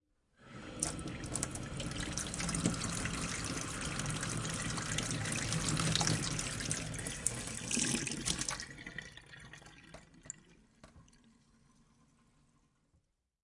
Tap running water metal sink draining
Running water from kitchen sink tap. Sound of water draining away.
Recorded using an Audio Technica shotgun mic, external pre-amp and Zoom H4n recorder.
sink, household, draining, kitchen, plug-hole, water, running-water, drain, metal, foley, tap